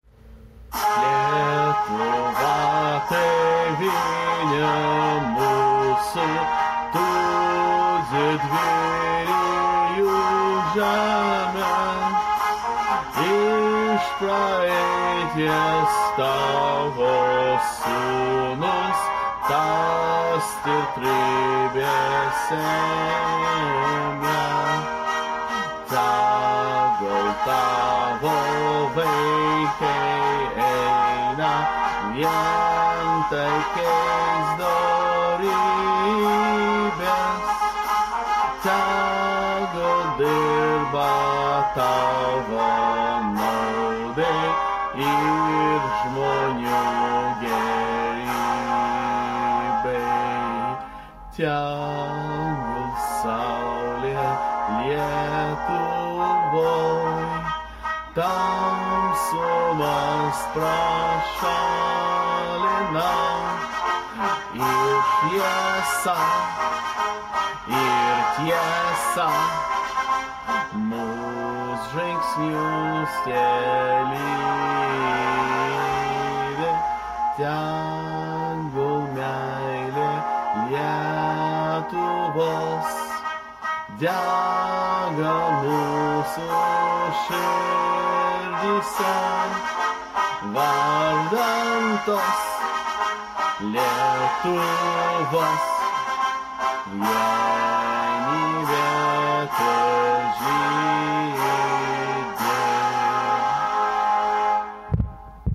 Lithuanian anthem sung by an ethnical Lithuanian born abroad
There is a tradition to sing the National song by every Lithuanian origin person no matter where they are in the world or space! 6 July at 9 pm Vilnius Time. Dainuokim kartu
Lietuvos himnas dainuoja Lietuviškos kilmė asmuo gime užsenije
#TautiškaGiesmė #GyvaTradicija #Liepos6
Eu, Europe, gesme, hymnas, Lietuvos, world